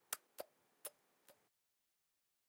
short audio file of a wet popping sound which simulates the effect of octopus tentacles
octopus, animal, underwater, owi, fx, pop, tentacle